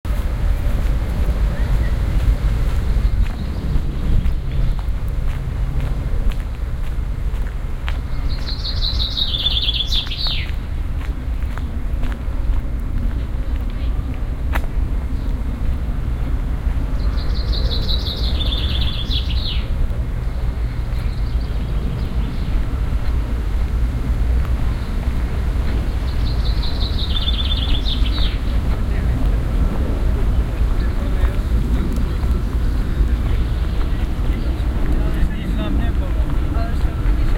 Queensway - Walking through Kensington Park